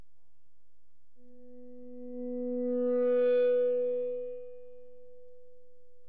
Feedback recorded from an amp with a guitar. Makes an eerie hum and can be taken strangely out of context. One of several different recordings.
amp, guitar, noise, feedback, tone, hum